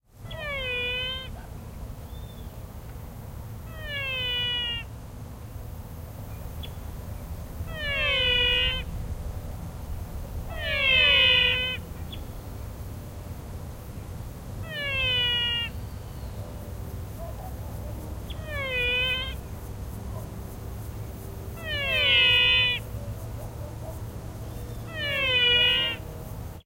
Sonido de ranas en el monte, grabado en el departamento de Canelones (Uruguay). 30 de diciembre de 2012.
Frogs sound recorded at Canelones (Uruguay) on decembre 30 2012.
paisaje, uruguay, sonoro, naturaleza, field-recording, soundscape, nature